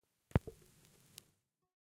sounds of an ending vinyl record